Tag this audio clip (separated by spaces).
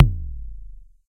kickdrum percussion drum drums bassdrum sample bass-drum kick